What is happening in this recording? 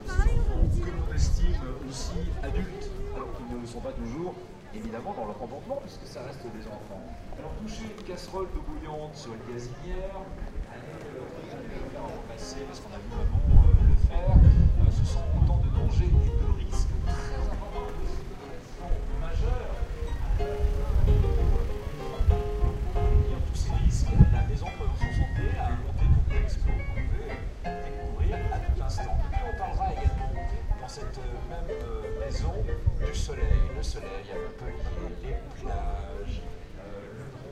streets of montpellier 001
"field recording" at Montpellier
field-recording, street, montpellier